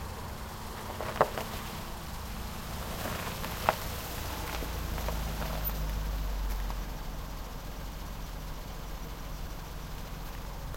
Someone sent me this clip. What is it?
tires snow ice slow
slow, ice, driving, snow, car